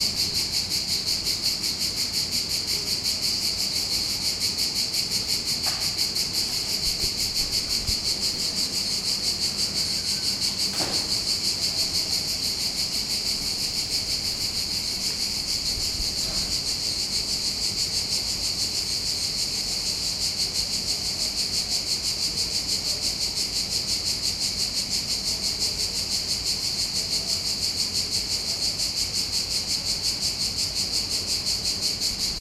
Cicadas during summertime recorded from a tunnel - the reverb is natural